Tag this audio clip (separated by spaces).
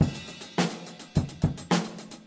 loop drum